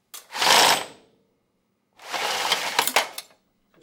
ratchet pulley winch pull metal plastic junk slide rattle
ratchet, pull, winch, plastic, junk, rattle, metal, slide, pulley